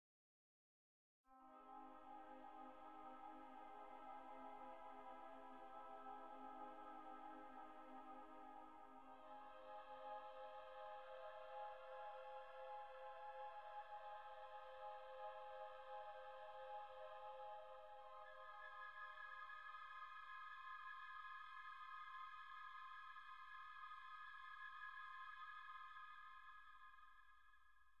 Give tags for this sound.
sci
fi

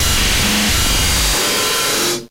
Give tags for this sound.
breakcore
new
noise
thing

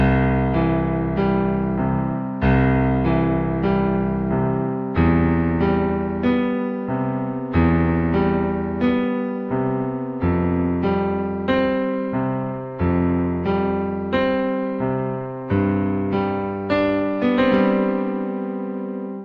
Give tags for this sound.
Piano
Klavier
dramatic

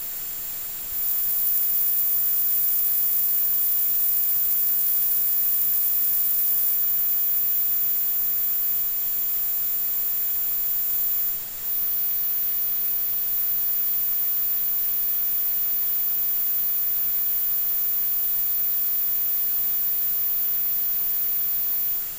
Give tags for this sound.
coil
emf
mic

